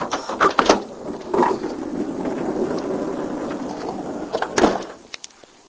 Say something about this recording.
An electric door on a Mini-Van opening.